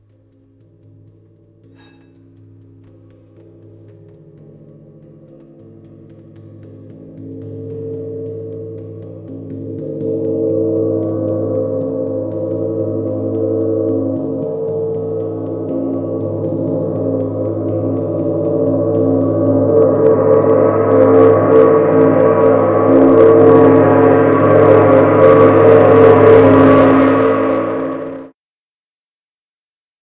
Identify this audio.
A series of gong strikes building in volume